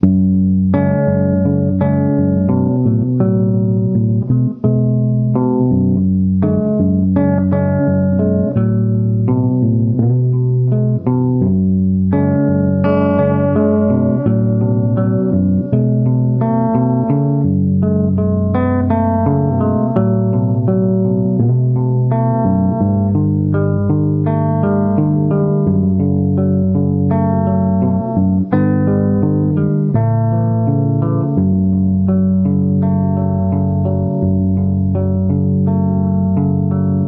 F#minor drama 84bpm
slow, guitar, movie